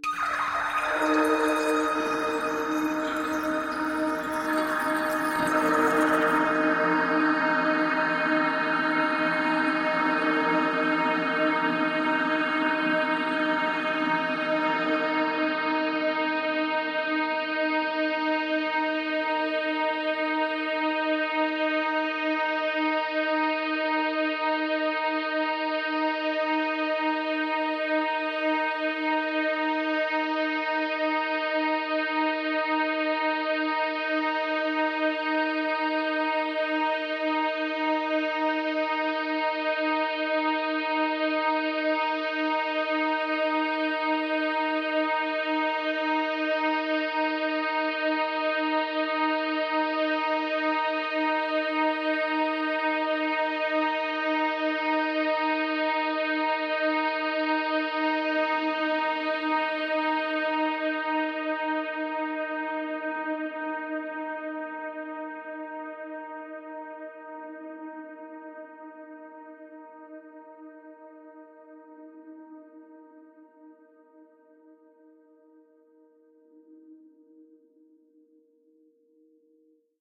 LAYERS 005 - Heavy Water Space Ambience - D#6
LAYERS 005 - Heavy Water Space Ambience is an extensive multisample package containing 97 samples covering C0 till C8. The key name is included in the sample name. The sound of Heavy Water Space Ambience is all in the name: an intergalactic watery space soundscape that can be played as a PAD sound in your favourite sampler. It was created using NI Kontakt 3 as well as some soft synths within Cubase and a lot of convolution (Voxengo's Pristine Space is my favourite) and other reverbs.
artificial, soundscape, space, water, pad, multisample, drone